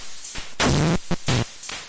I BREAK IT YOU BUY IT !!! It's a new motto.....
Hehehehe This is a Bent DR 550 MK II YEp it is....